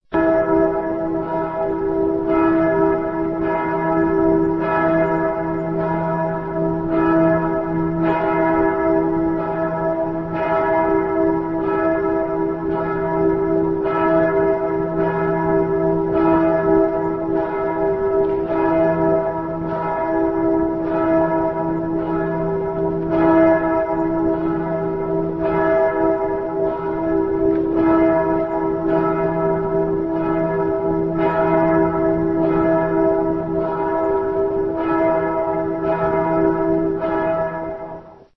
this is a Kölner dom bell :kapitelsglocken.videotaped and edited to make it audio(record it the video myself with a blackberry phone!)
glocken, bell